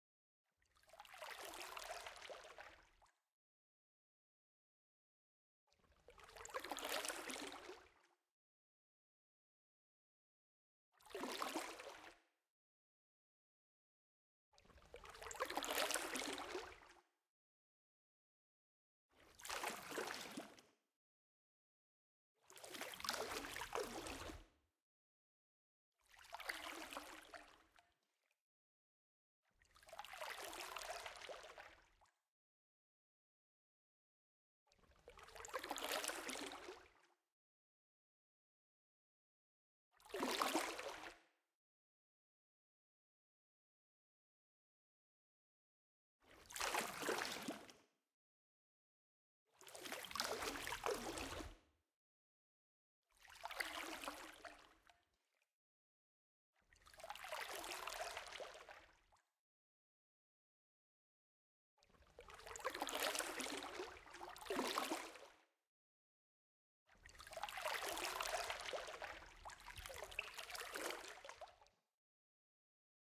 Slow Quiet Water Ripples (remix of 338345)
Quiet, slow, gentle ripples of water reminiscent of a very quiet pond or lake. Noise-free.